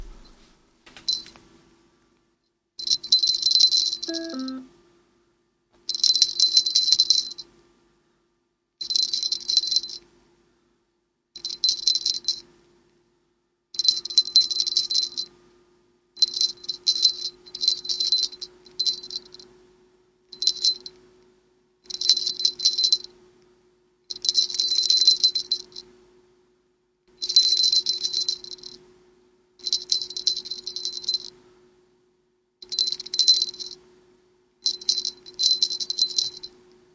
This is the sound of a small cat's collar bell which sounds like a cat or indeed a pixie! Recorded with a Shure SM58 and a Marantz digital recorder.